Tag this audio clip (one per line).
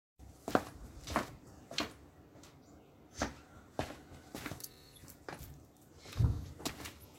sound
walking